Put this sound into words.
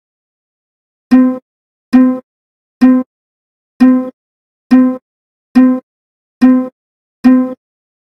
Some plucks with old zither instrument recorded at home, retuned in Ableton.
home-recording, rodentg3, Zither